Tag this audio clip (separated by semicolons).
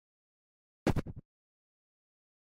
Mastered; Free; Edited